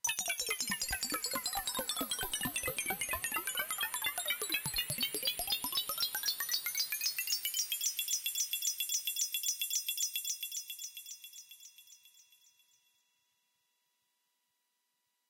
Synth bells bent with delay.
bells, liquid, metallic, synth